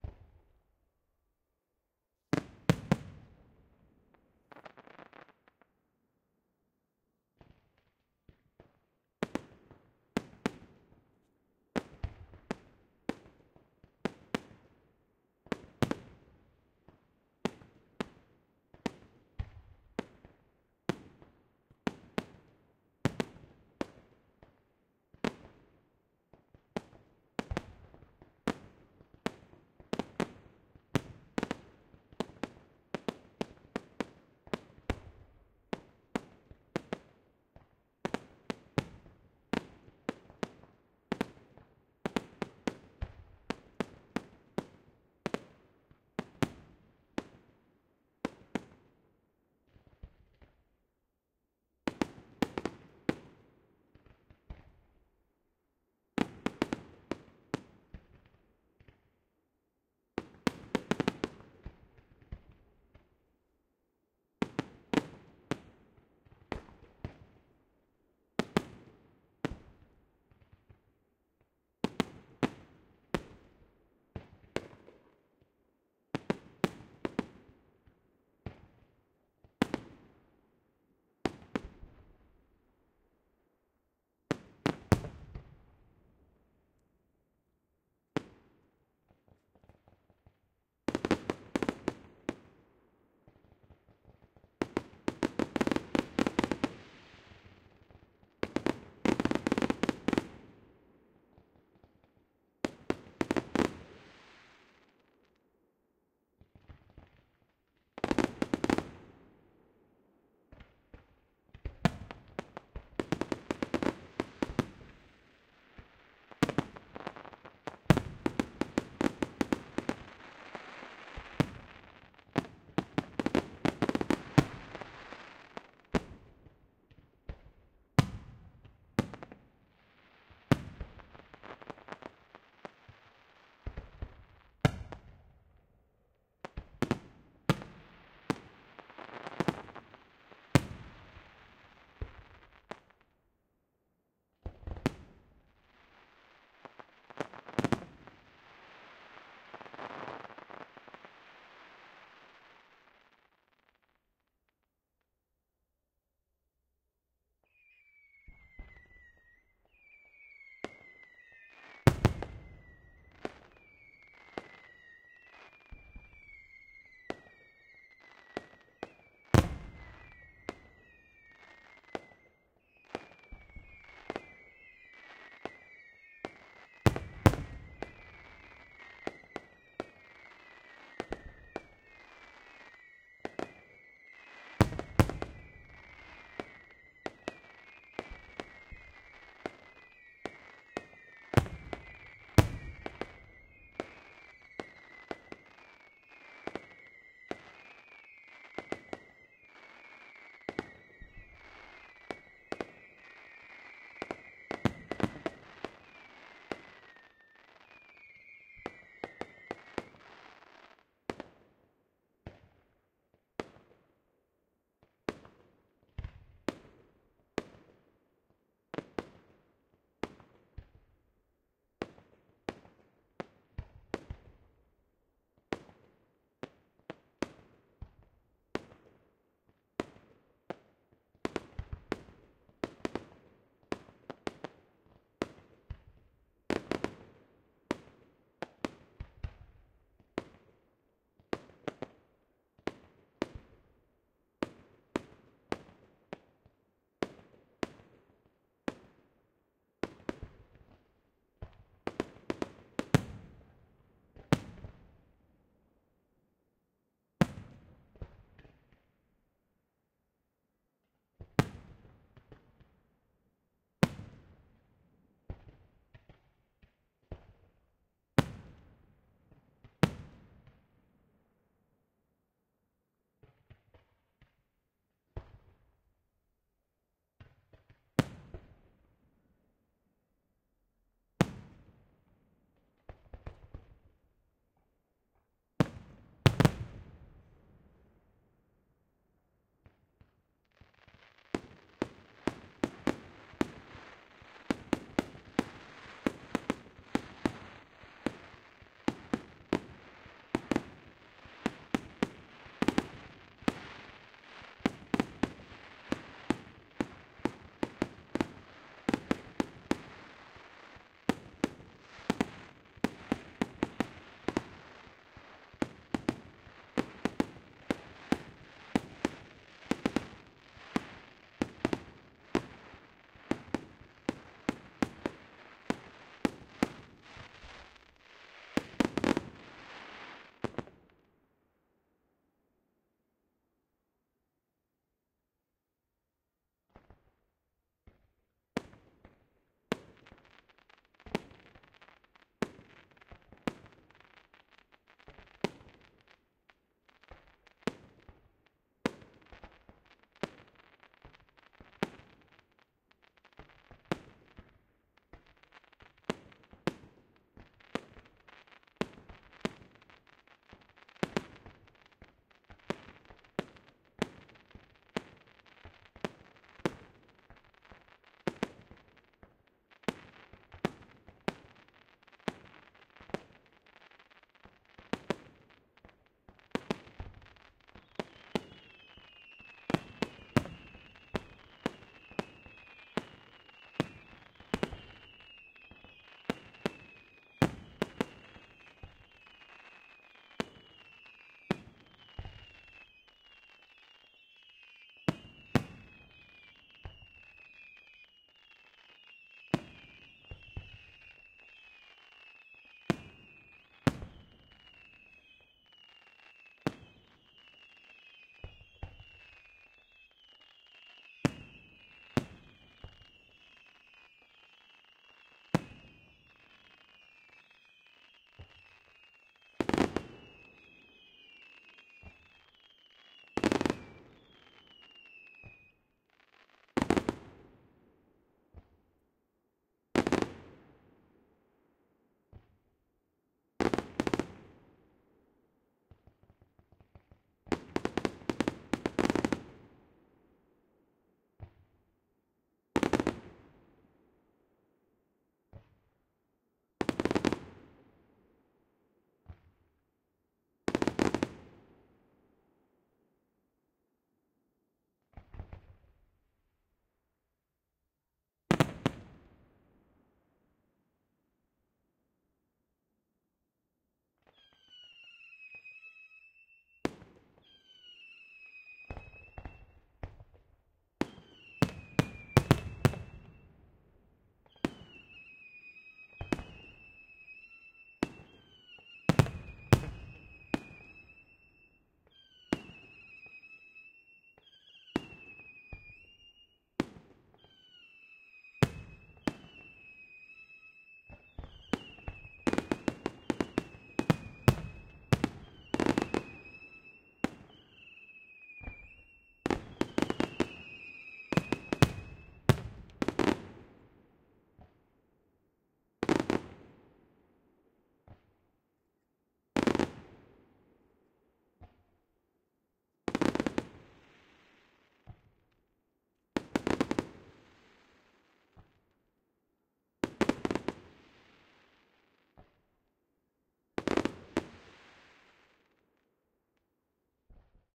Fuegos 2016 Binaural
2016 Fireworks recorded with a Soundfield SPS200 on a Aeta 4Minx, processed by HarpexB
2016, ambisonic, Binaural, Fireworks, soundfield, sps200